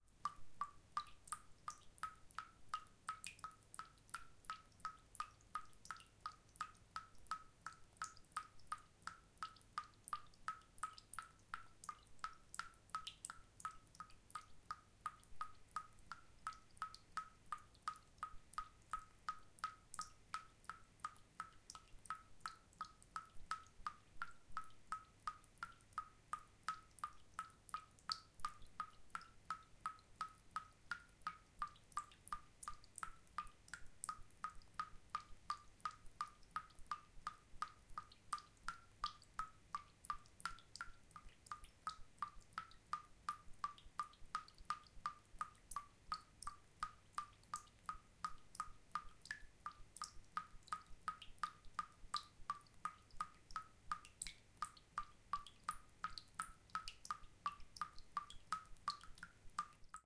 Dripping, Very Fast, A
Raw audio of very fast dripping in a sink.
An example of how you might credit is by putting this in the description/credits:
And for more awesome sounds, do please check out my sound libraries or SFX store.
The sound was recorded using a "H1 Zoom recorder" on 5th April 2016.
Random Trivia: This "Dripping" pack marks the anniversary of my first uploaded sound.
drip, dripping, drop, fast, sink, very, water